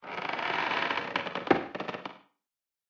Floor cracking sound